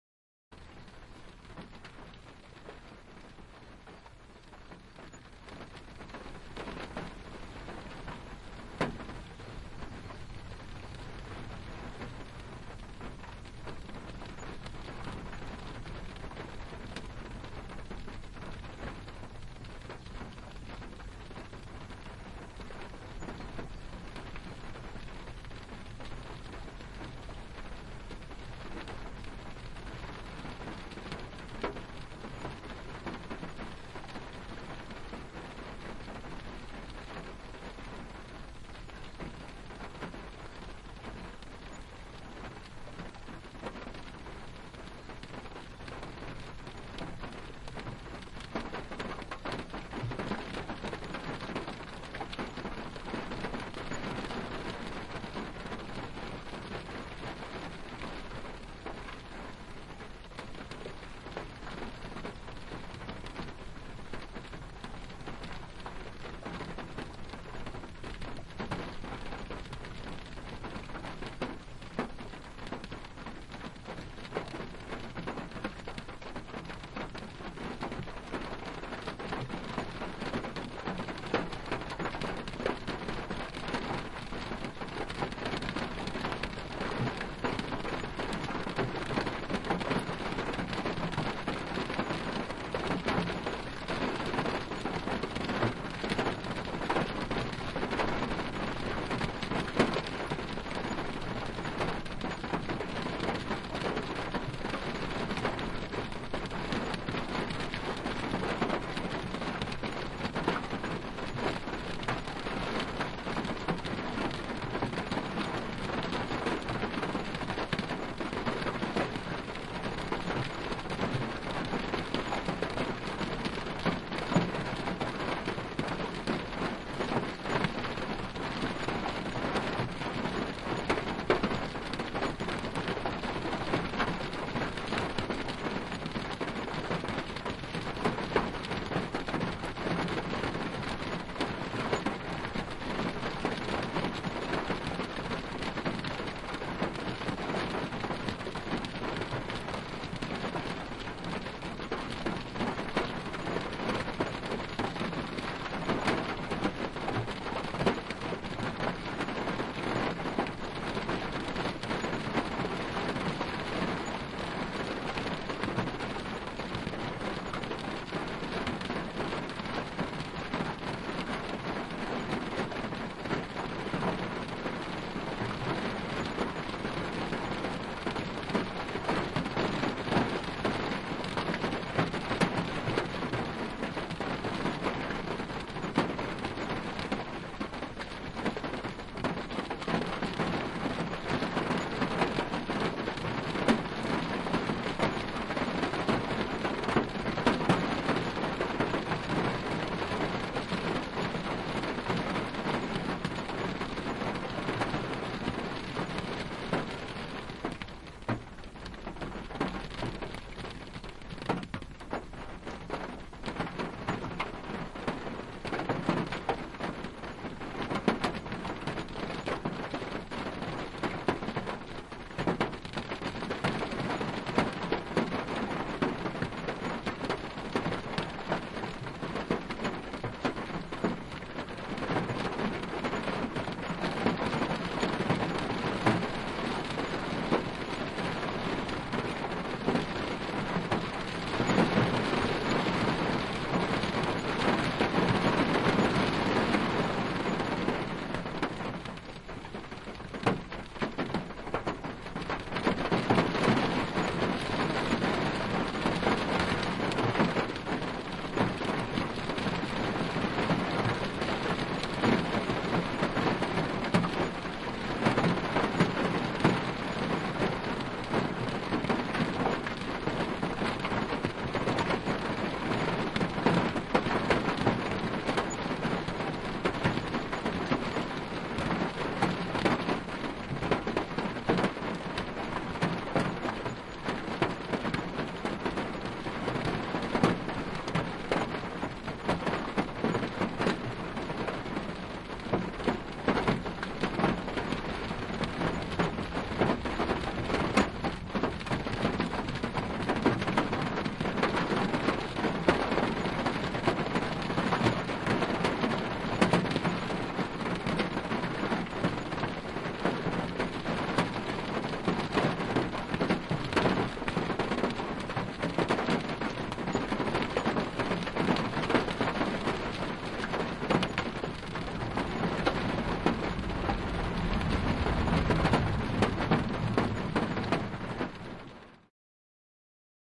Rain from inside car
Sitting in the drivers seat. Engine off. Listening to the rain.
Recorded with Soundman OKM II mics and a ZOOM H2N.
Listen with headphones for binaural effect.
inside, headphone, ambience, rain, field-recording, car, binaural